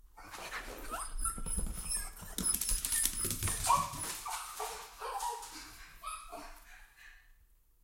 dog small whimper +run
dog, run